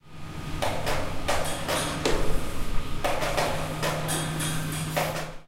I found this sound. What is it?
Crane, Noises 01
An active crane that carries heavy objects, workers are pushing buttons and making all kinds of sounds and noises.
This sound can for example be used in real-time strategy games, for example when the player is clicking on a building/construction - you name it!
/MATRIXXX
area, builders, construction, crane, field, fields, machine, noise, noises, object, objects, work, workers, work-field